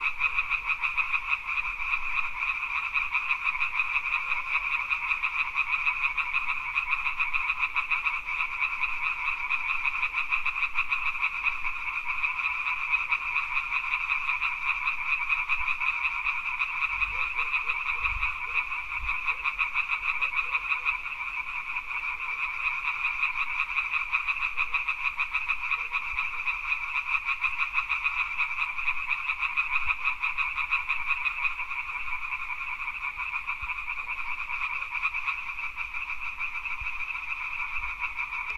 The sound of frogs croaking in the evening at the lake recorded with Tascam DR 40X
croaking, frog, field-recording, croak, lake, pond, frogs, ambiance, nature, spring